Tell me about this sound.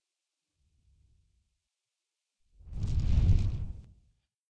Fireball Flyby 1b
Casting a Fireball Sound
Recorded with Rode SE3
Used foil, plastic bags, brown noise and breathing gently into the microphone layered together using reverb for the tail and EQ to push the mid-low frequencies. Have not panned it from Left - Right for greater flexibility
This sound also features as a layer in many of my meteor sounds. Pitched down version of flyby 1
Fireball, Magic, fly-by, Projectile, Spell